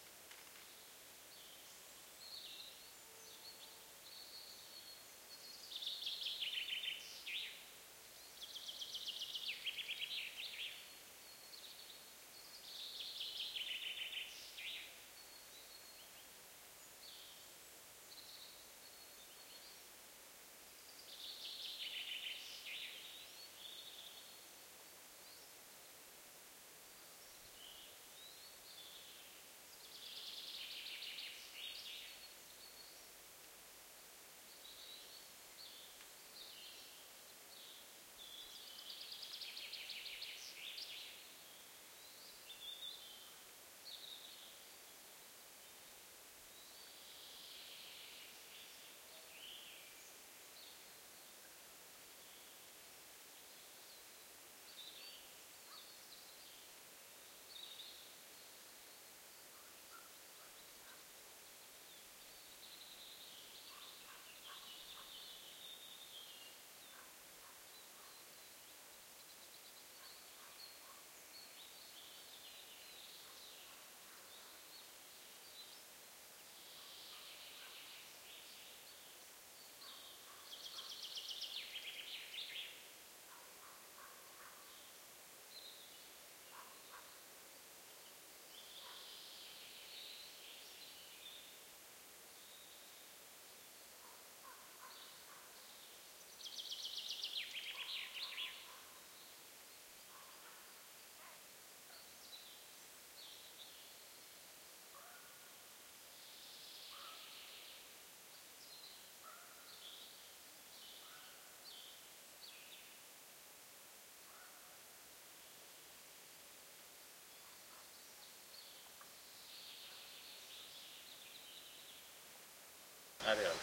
Bird song in forest
Field recording of birds in the woods of Western Sweden in the spring of 2009. Recorded with Sony equipment.
Birds, Field-recording, Spring, woods